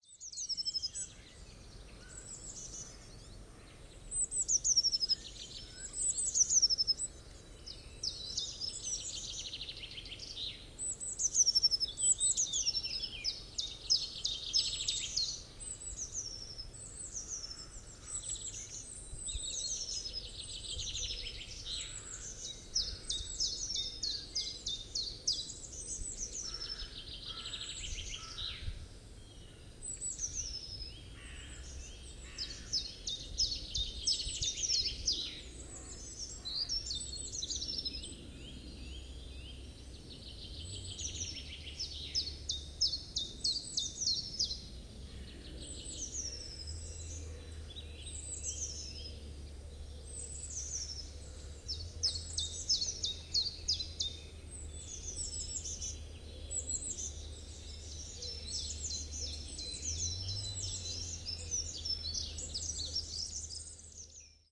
120405 spring afternoon in the forest
Spring afternoon in a forest north of Cologne. Different species of song birds.
Zoom H4n